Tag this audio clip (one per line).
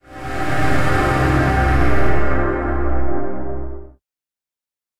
granular
pad
choir
background
processed